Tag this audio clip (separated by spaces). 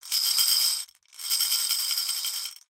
shake marbles bowl glass